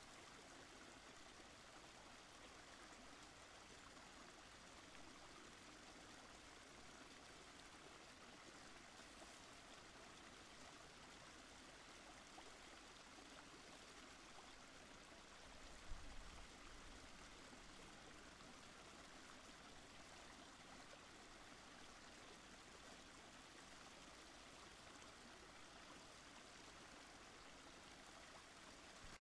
Sound of a small waterfall.